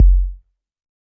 Analog Drum Kit made with a DSI evolver.
Analog, Drum, Kit